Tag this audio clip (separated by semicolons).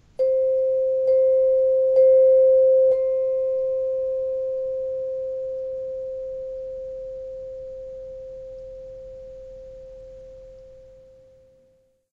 mallets; percussion; vibraphone; chord